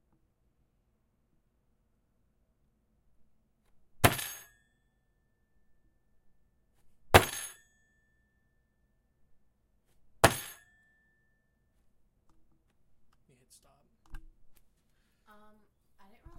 Table Slam (Closed Fist)
Table Slam Closed Fist
Closed-Fist, Slam, Table-Slam